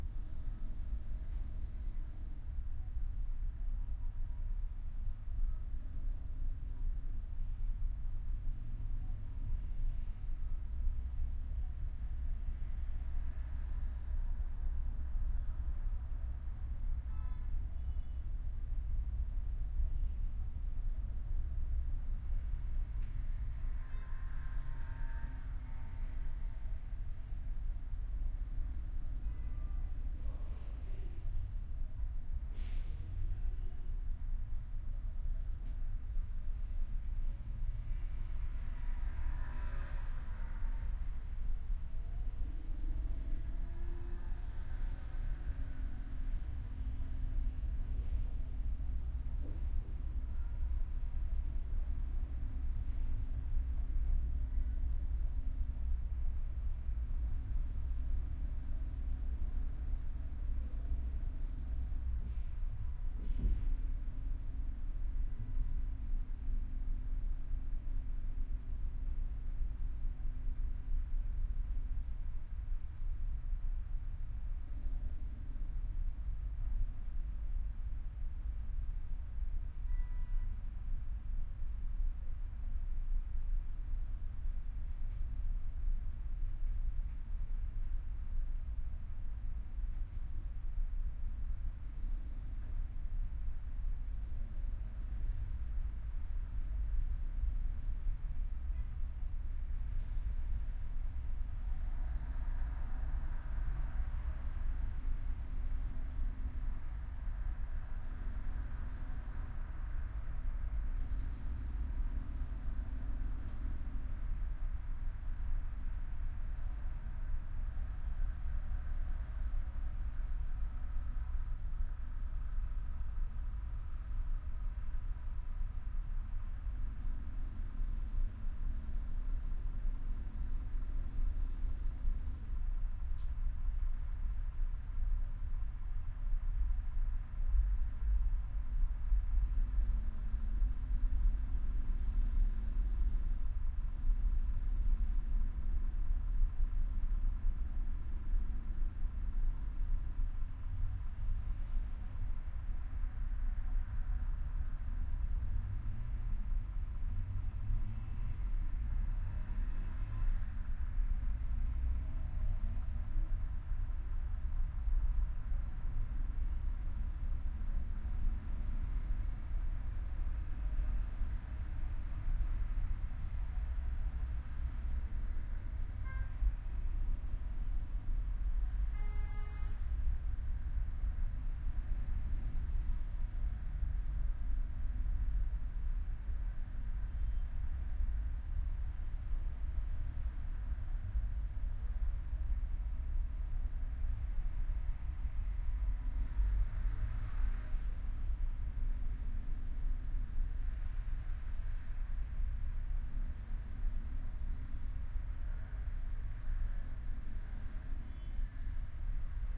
Roomtone Traffic Interior distant jackhammer 11AM
traffic, City, Interior, Ambiance, Paris